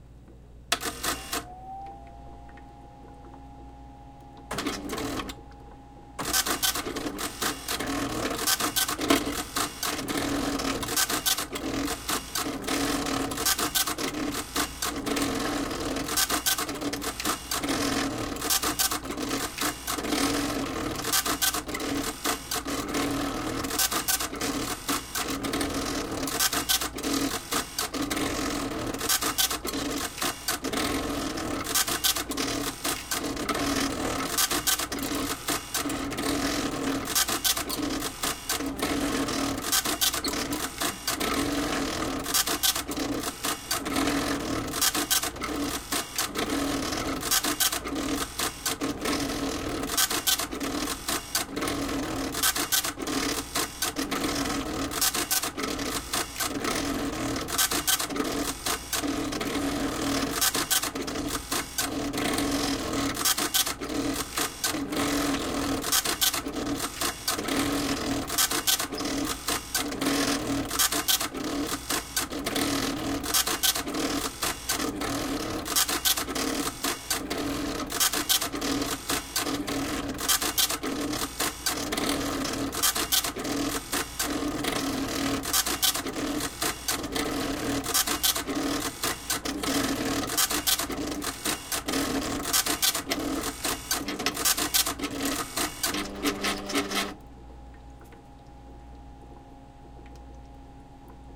slide printer
After the operator has made the slides they are then put through a printer to add any needed information such as numbering or photographer name, etc.
foley, historic, dot-matrix, printer, machine, camera, photography, whirr, raw, sample, click, shutter, sound-museum, bluemoon